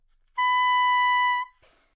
Part of the Good-sounds dataset of monophonic instrumental sounds.
instrument::oboe
note::B
octave::5
midi note::71
good-sounds-id::8014
B5, good-sounds, multisample, neumann-U87, oboe, single-note